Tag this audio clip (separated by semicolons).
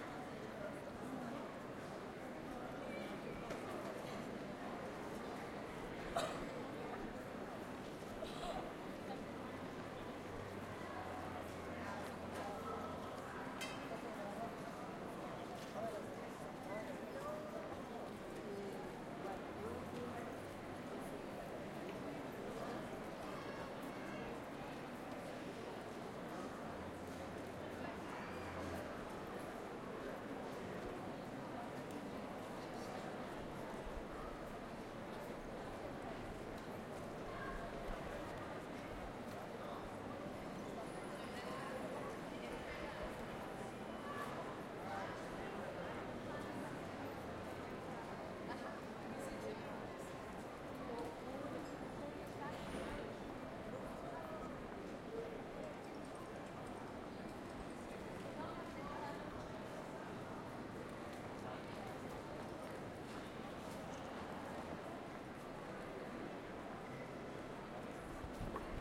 ambience; atmosphere; crowd; field-recording; people